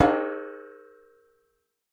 Plat mŽtallique 2
household, percussion